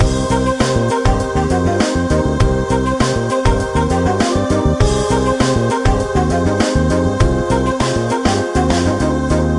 Loop CoolDude 04
A music loop to be used in storydriven and reflective games with puzzle and philosophical elements.
loop, video-game, sfx, Thoughtful